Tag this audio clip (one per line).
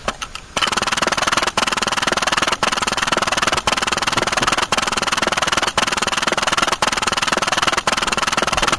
electronic
display
fast
loud
screen
Braille
rapid